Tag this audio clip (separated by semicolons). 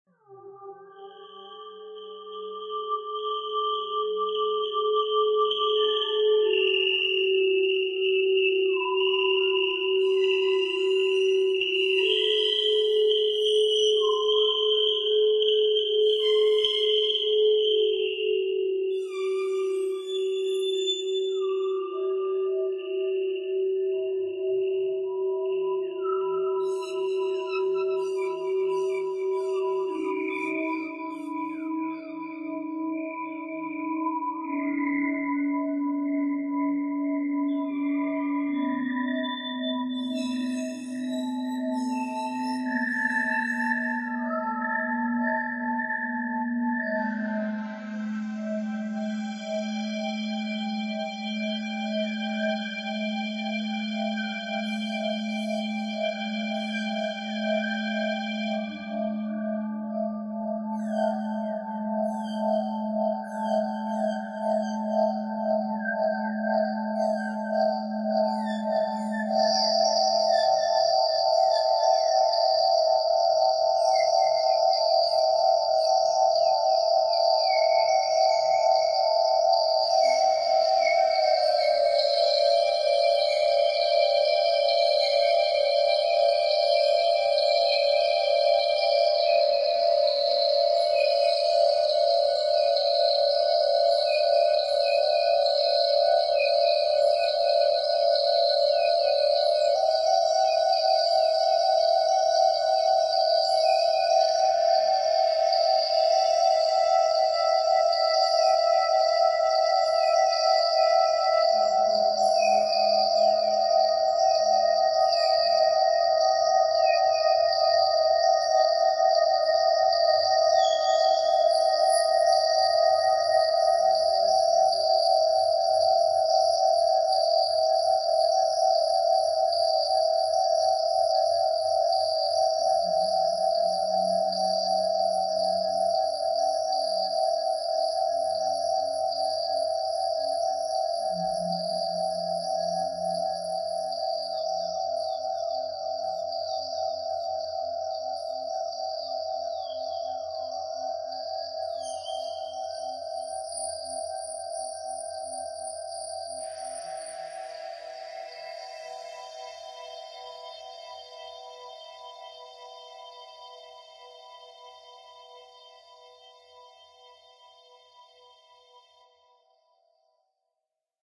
creepy; scary; nightmare; horror; music; haunted; background; ghost; spooky; suspenseful; suspense